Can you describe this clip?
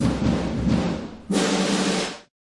Drum POWer Fill Drumroll Snare rolls Drums - Nova Sound
NovaSound, Percussion, The, Erace, Snare, rolls, POWer, Nova, Sound, SoundNova, Drumroll, Hate, Hip, Fill, Drums, Hop, Drum, FX, Beat